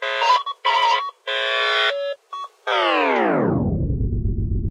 dkustic 081017 01 electronic rf cable tester Remix
electro, diminuendo, electronic, buzz, beep, hum